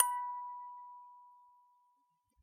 eliasheunincks musicbox-samplepack, i just cleaned it. sounds less organic now.